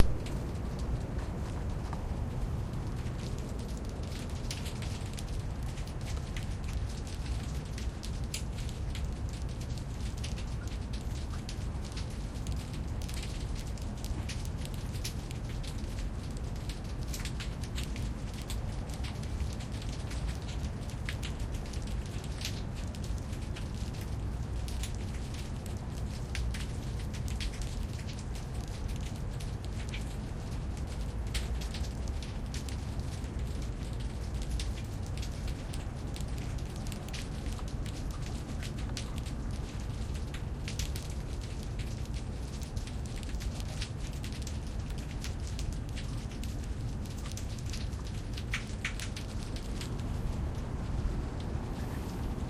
Small shelled invertebrates make a popping sound on a pier over the intracoastal waterway.